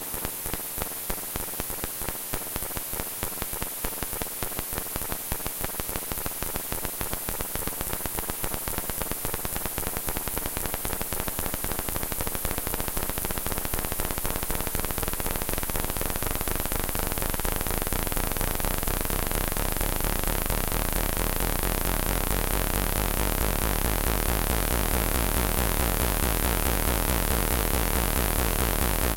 VCS3 Sound 9
Sounds made with the legendary VCS3 synthesizer in the Lindblad Studio at Gothenborg Academy of Music and Drama, 2011.11.06.
Analog-Noise, Analog-Synth, Modular-Synth, Spring-Reverb, VCS3